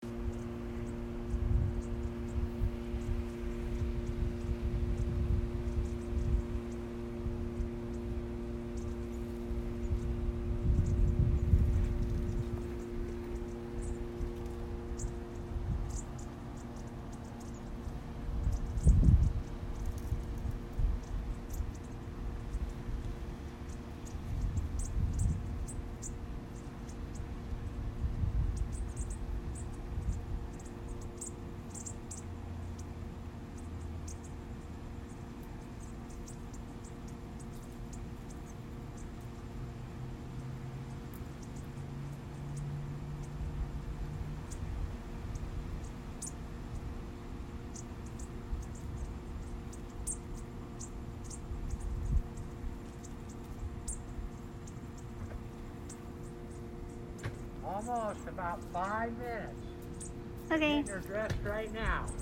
Little tiny birds hiding in some small trees. There is some AC background noise.
nature, bird, birdsong, field-recording, birds